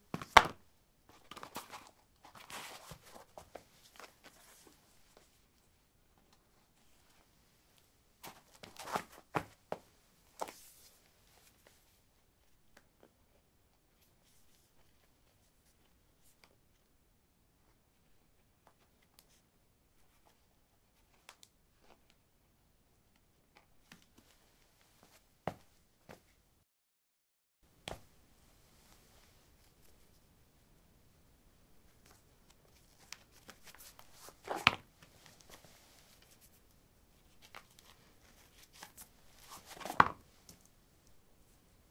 concrete 05d summershoes onoff
Putting summer shoes on/off on concrete. Recorded with a ZOOM H2 in a basement of a house, normalized with Audacity.
step, footsteps, steps